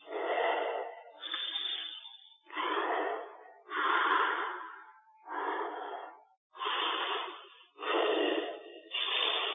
Creepy Breath NEW
A creepy breath [Edited with Wavepad] usable in horror games, movies, etc.